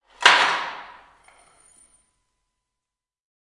Wood Metal Chain Smash Hall
A plank falling on the floor in a pretty big empty basement at our school (HKU - KMT, Hilversum, Netherlands), with a chain sound afterwards. Recorded in Stereo (XY) with Rode NT4 in Zoom H4.
chain, church, falling, hall, hit, metal, plank, smash, wood, wooden